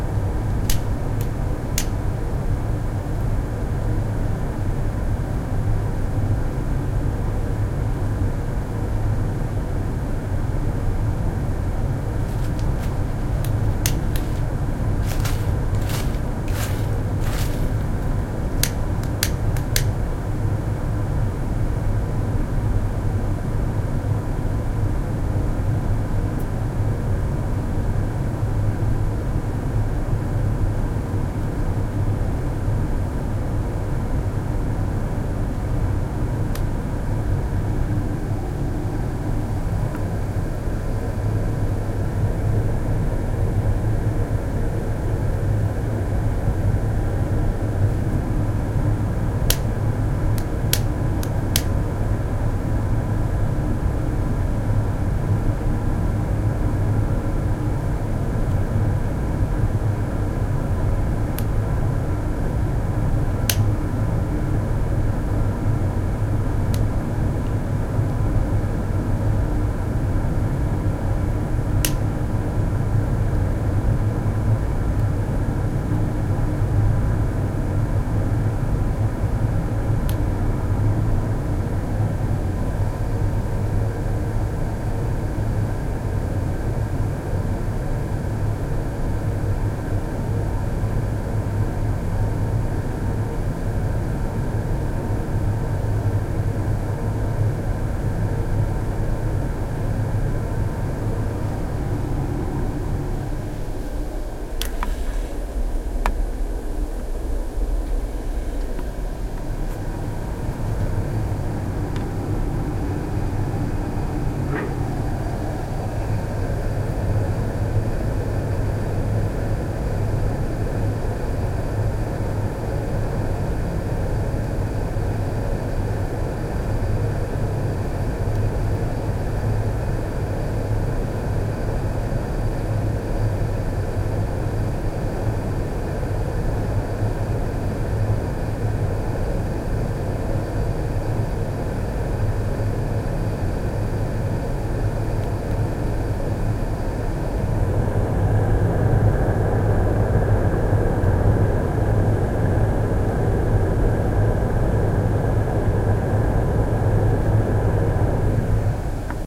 starting fire in blacksmith
Starting a fire in a blacksmithing furnace, noisy recording due to ventilation system.
r26, fire, R, Roland, blacksmith, ventilation, de, burning, air, ntg3